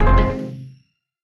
Ethereal sounding Chord, G#Maj. Made in ableton and a mix of sampling. I just love the sound.